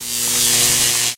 Power up sound created for a component of a game constructed in the IDGA 48 hour game making competition.